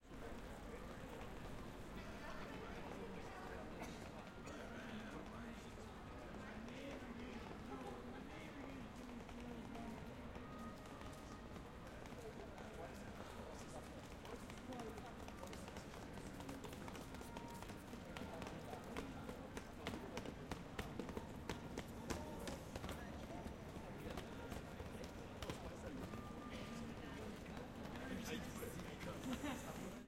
A selection of ambiences taken from Glasgow City centre throughout the day on a holiday weekend,